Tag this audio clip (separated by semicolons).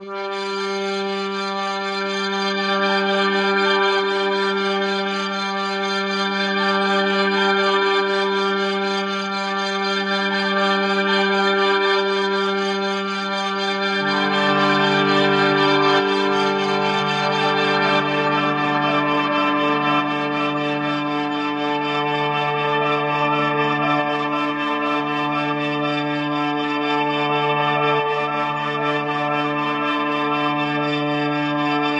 Orchestral; pad-sounds